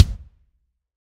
BNE KICK 001
This is a hybrid real/sampled kick based on a Pro Tools studio recording of a drum kit and a popular drum machine sample. The real kicks in this sample pack are Taye, Yamaha, DW and Pearl whilst the samples come from many different sources. These "BNE" kicks were an attempt to produce an all-purpose heavy rock kick sound. They contain a partial from a well-known kick sample, itself a combination of two Alesis D4 presets.
alesis, d4, drum, hybrid, kick, real, rock, sample, studio